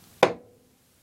Hammer Hit 3
Several hits (on wood) with a medium-sized hammer.
hammer, hit